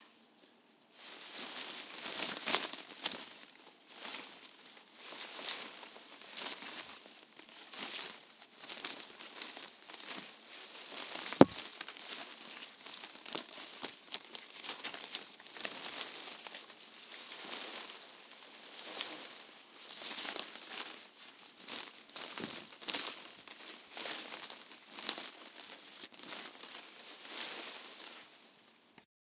Walking through dry bushes